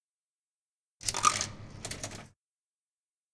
This sound shows the noise that some coins do when they fall into a drinks machine.
campus-upf, Coins, Money, Tallers, UPF-CS14